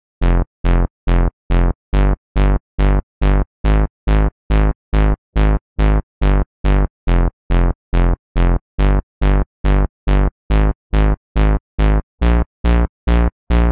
Tr4cker trance bass 2
bmp, hard, 150, now, 2, arp, hardtrance, beat, bass, trance